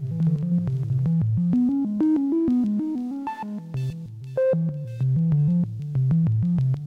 Micron Flute 1
Alesis Micron Stuff, The Hi Tones are Kewl.
ambient, acid, electro, micron, chords, idm, bass, kat, leftfield, beats, alesis, base, synth, glitch